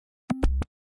Sounds from a small flash game that I made sounds for.
Alien, game, space